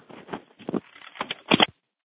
Hang-up at the end of call. You can hear noise of the cable and the receiver.
hang-up, click, call, drop, cable, receiver, phone